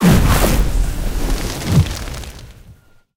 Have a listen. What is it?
Fire - Effects - Impacts - Complex 04
spark, flame, fireplace, impact, swoosh, glitch, burst, sparks, flames, burning, whoosh, crackle, sizzles, crackling, fire, sci-fi, intense, texture, sizzling, field-recording, spraying